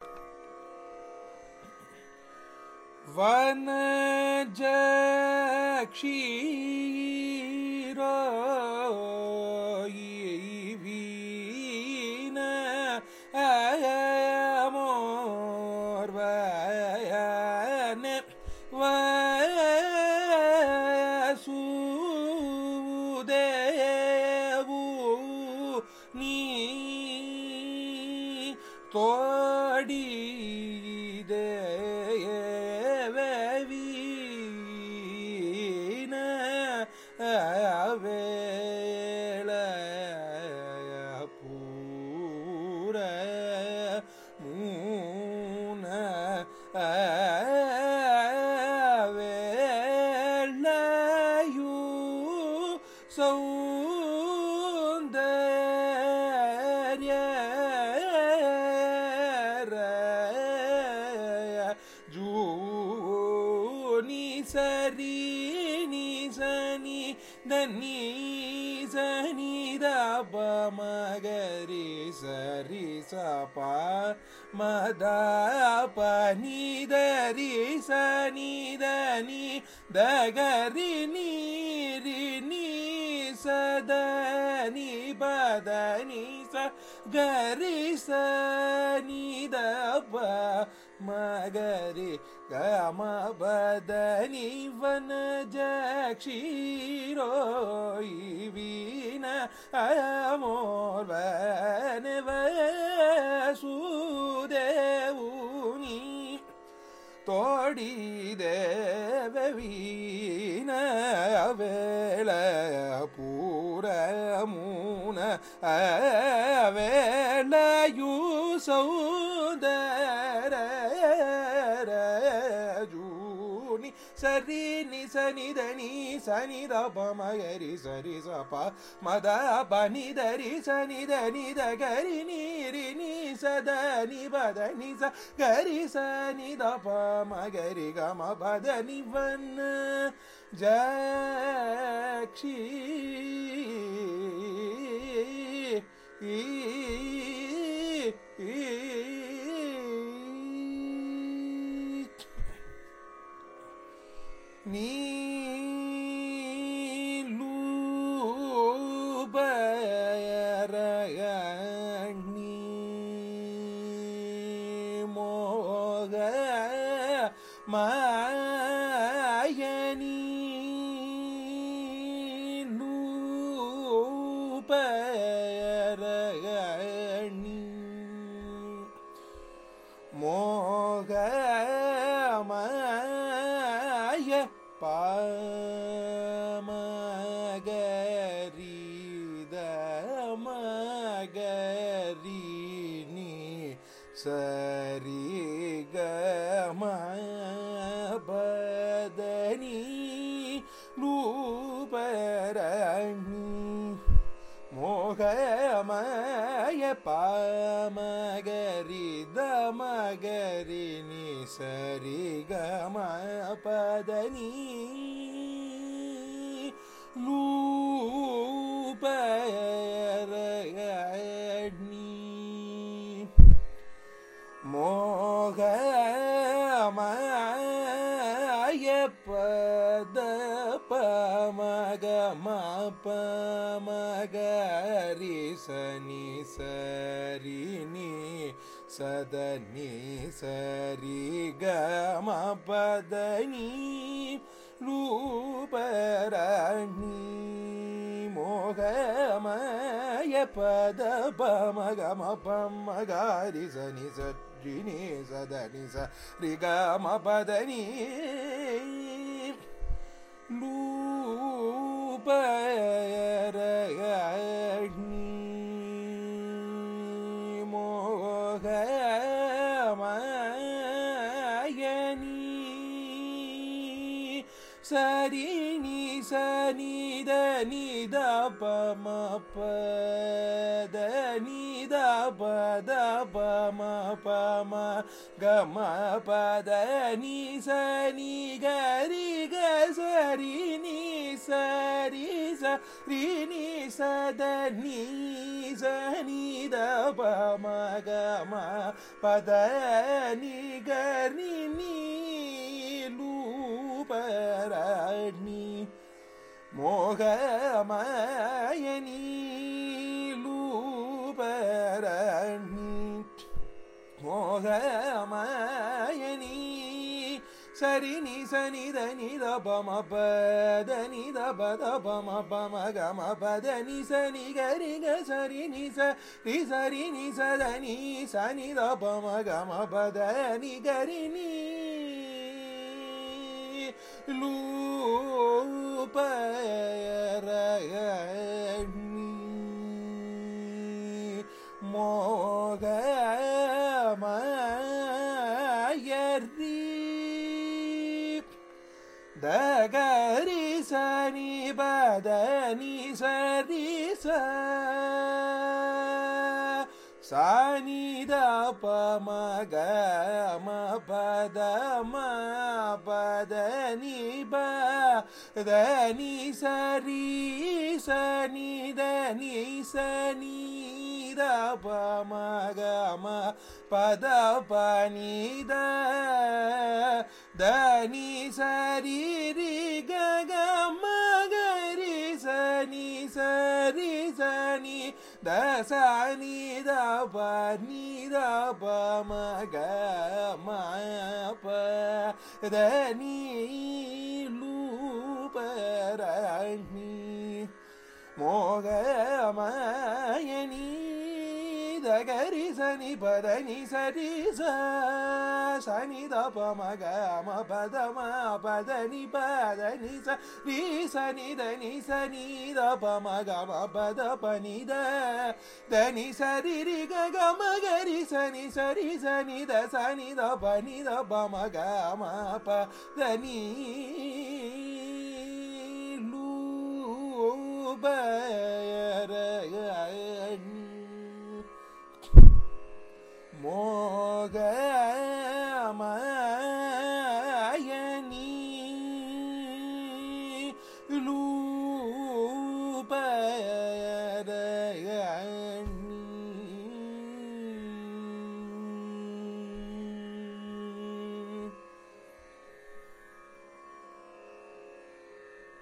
Carnatic varnam by Vignesh in Kalyani raaga
Varnam is a compositional form of Carnatic music, rich in melodic nuances. This is a recording of a varnam, titled Vanajakshiro, composed by Ramnad Srinivasa Iyengar in Kalyani raaga, set to Adi taala. It is sung by Vignesh, a young Carnatic vocalist from Chennai, India.
varnam, iit-madras, carnatic, carnatic-varnam-dataset, music, compmusic